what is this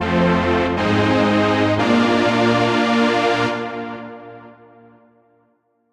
Jingle Win 01
An synthesized winning sound to be used in sci-fi games. Useful for when finishing levels, big power ups and completing achievements.
achivement, celebrate, complete, futuristic, game, gamedev, gamedeveloping, games, gaming, high-tech, indiedev, indiegamedev, jingle, science-fiction, sci-fi, sfx, video-game, videogames, win